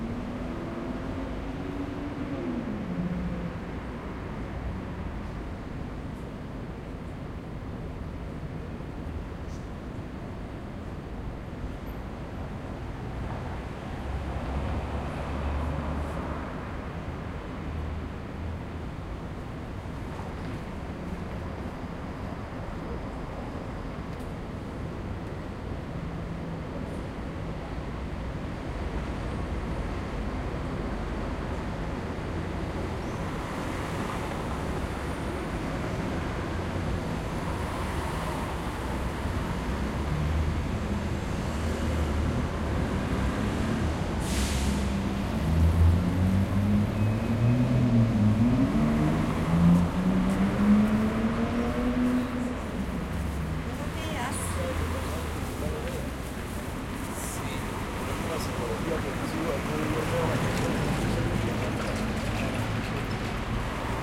Calle 72 con 5ta - Vehiculos y voces
Grabación en la Calle 72 Kr 5ta Bogotá-Colombia
Sonidos de vehículos, transporte público y voces de peatones a las 07:16 a.m.
Field recording from Calle 72 Kr 5ta Bogotá - Colombia
Vehicles, public transport and pedestrians at 07:16 a.m
bogota, bus, field-recording, peatones, public-transport, voice